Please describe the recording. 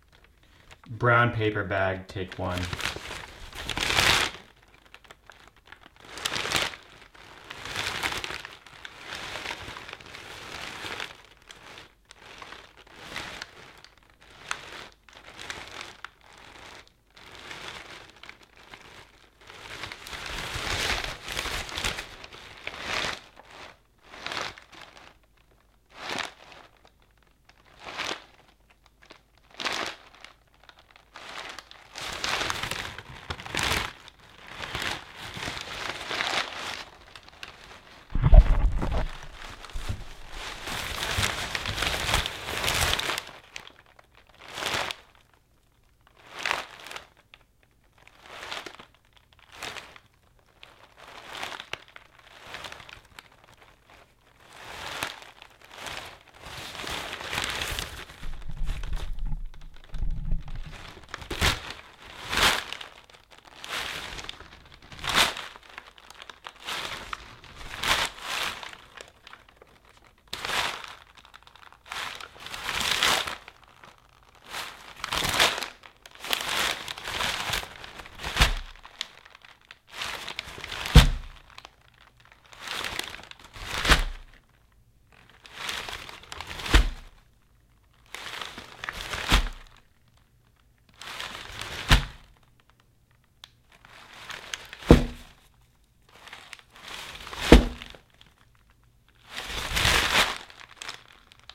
Brown paper bag crumpling, rumpling, wrapping. Recorded with Rode mic on Zoom H4N.
bag, crumple, paper, paperbag, wrap, wrapping